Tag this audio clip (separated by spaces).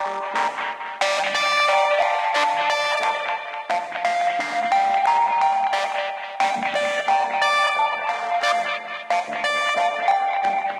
DuB HiM Jungle onedrop rasta reggae roots